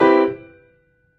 Assorted chord oneshots played on a piano that I found at the University Of Sydney back in 2014.
Sorry but I do not remember the chords and I am not musical enough to figure them out for the file names, but they are most likely all played on the white keys.